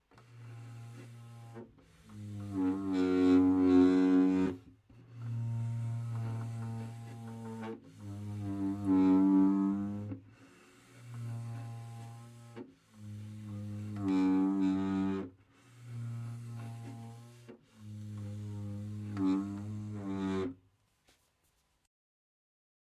Chair creaking on the floor
I move my chair and it creacks. Recorded with Oktava MK-419.
chair, room-recording, movement